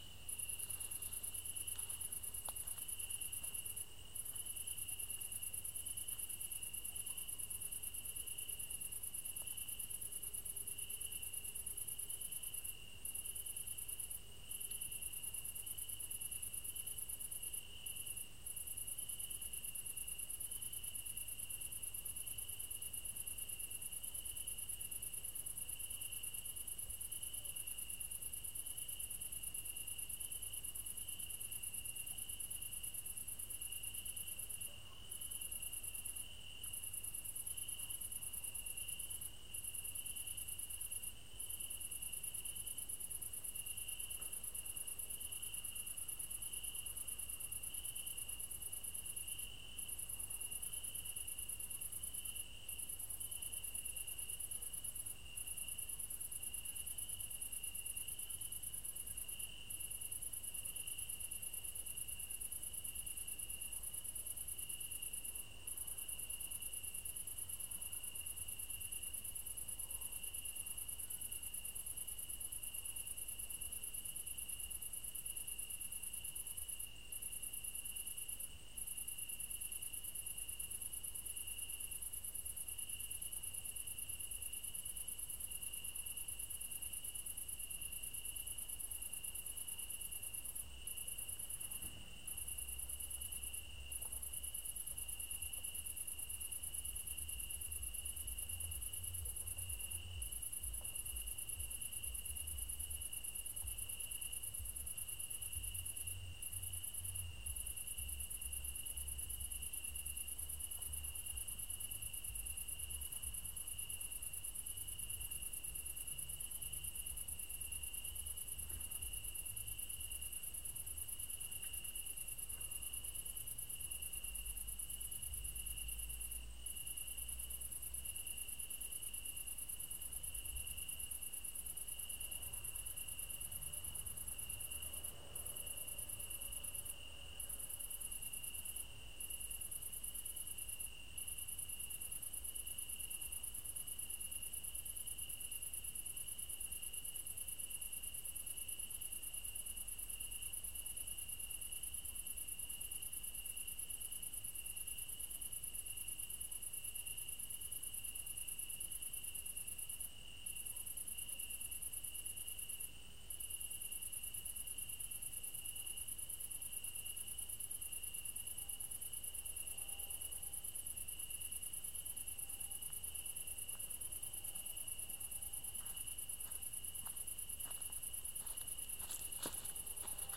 After sunset I hanged on my MP3 player on the branch of a tree and recorded the ambiance. File recorded in Kulcs (village near Dunaújváros), Hungary.
ambient, cricket, field-recording, horror, nature, night, thriller